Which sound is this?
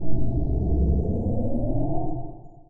Rising converging synthesized tones. Created for a game built in the IDGA 48 hour game making competition.
computer, engine, game, power-up, powerup, propulsion